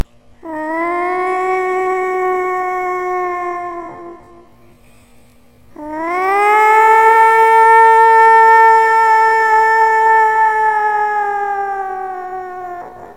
moan7 ECHO HIGH PITCH
high pitched moaning of a woman for erie horror effect